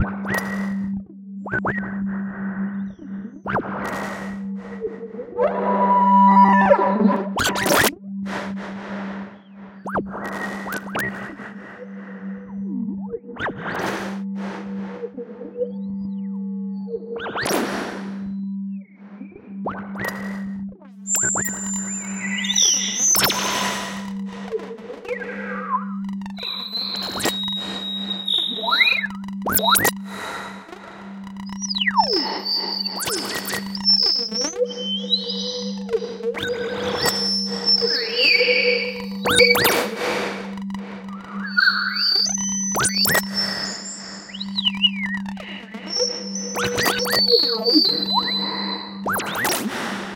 starObject Resosclamp
Careless asteroid whispers in the dark (of space).
abstract, atonal, effect, experimental, fx, resonant, sci-fi, sfx, sound-design, sound-effect, space, synth